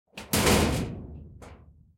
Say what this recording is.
small-metal-hit-14
Metal rumbles, hits, and scraping sounds. Original sound was a shed door - all pieces of this pack were extracted from sound 264889 by EpicWizard.
bell, blacksmith, hit, impact, nails, percussion, ting